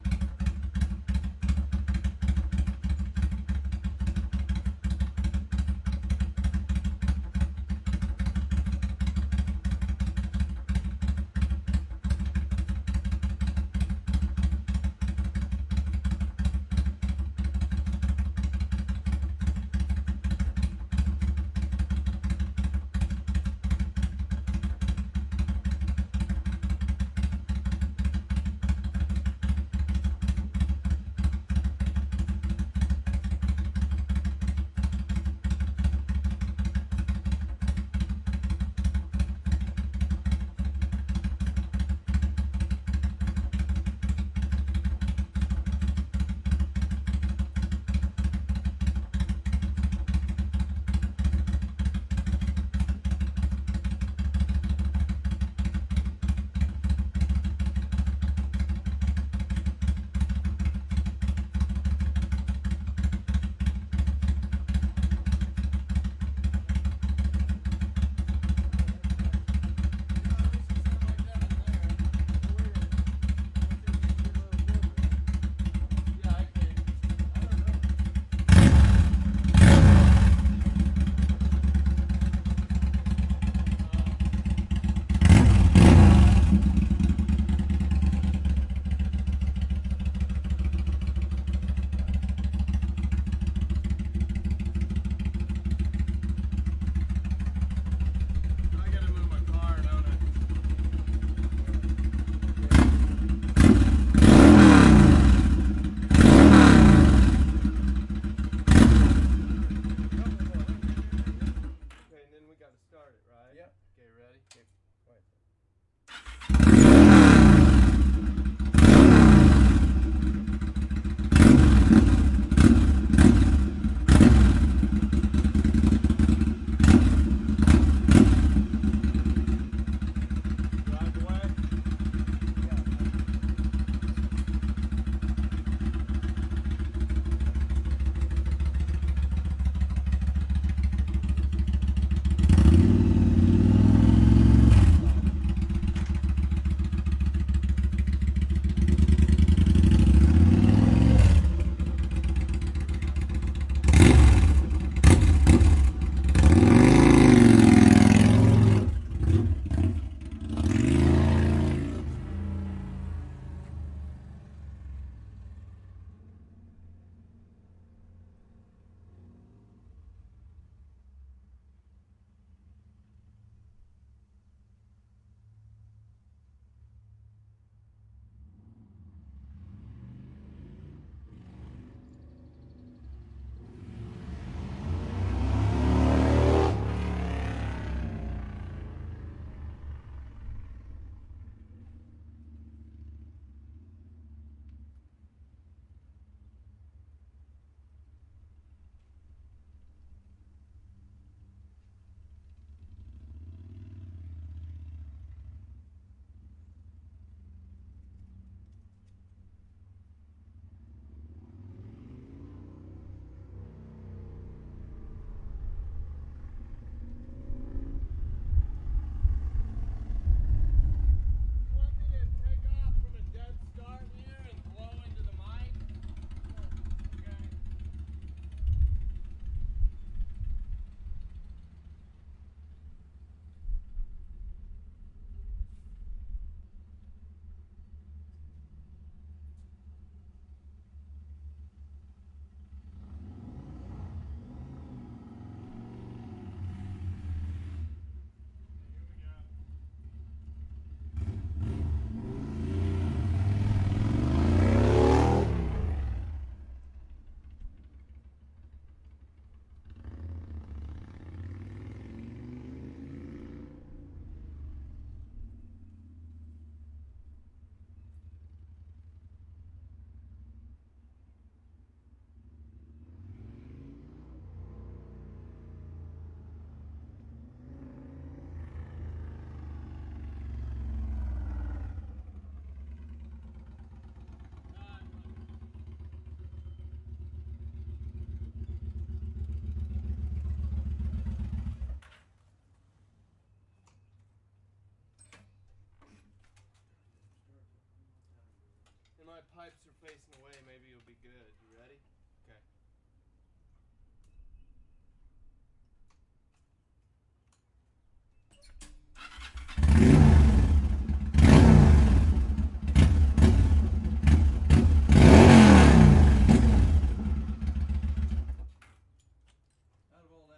Yamaha V star custom with racing pipes. revving motor and several drive bys, single dynamic microphone in mono so I can be panned where needed
Drive-by-motorcycle, motorcycle-motor, revving-motorcycle